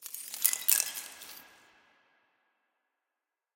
Dropped, crushed egg shells. Processed with a little reverb and delay. Very low levels!